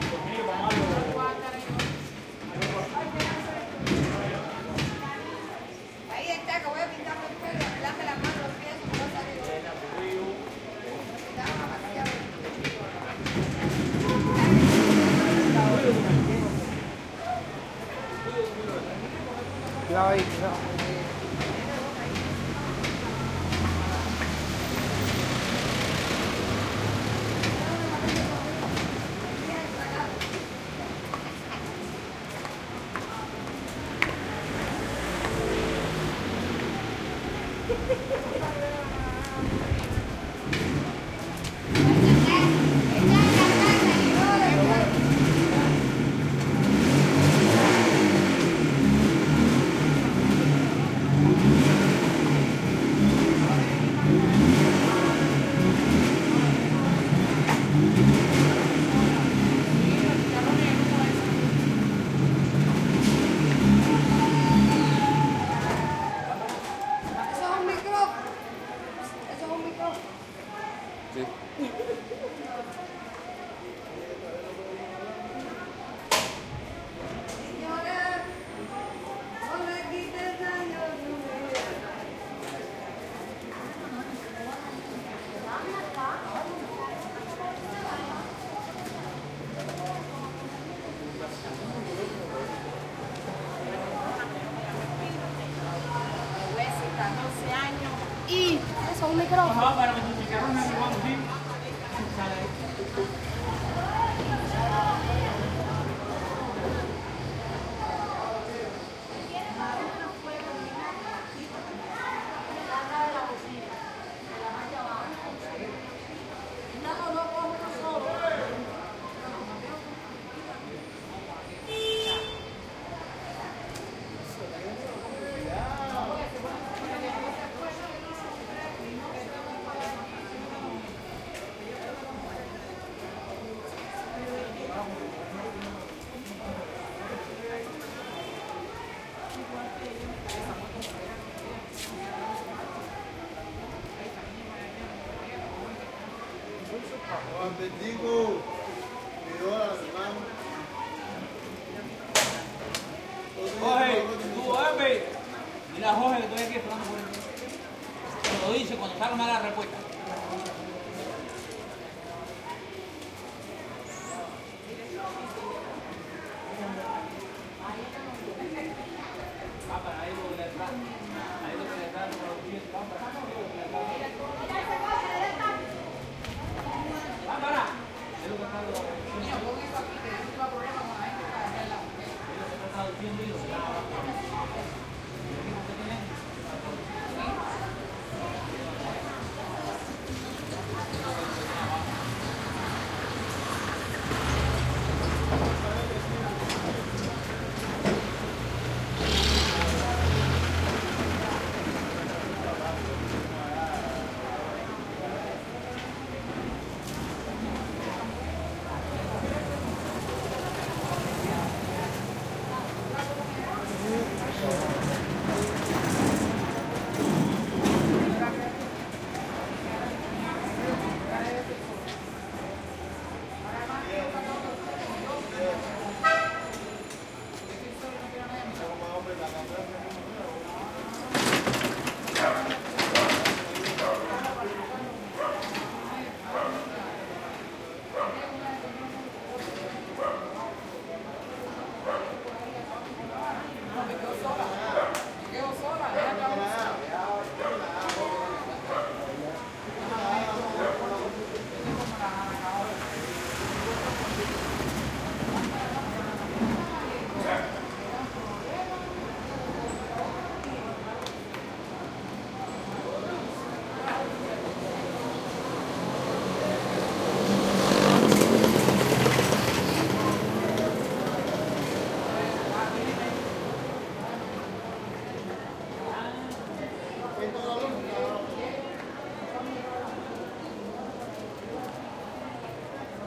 street Old Havana busy activity and small motor nearby +motorcycle rev start Havana, Cuba 2008
Old, activity, motorcycle, Havana, Cuba, field-recording, busy, street